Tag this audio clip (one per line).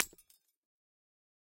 hammer
smash
shatter
bright
glass
ornament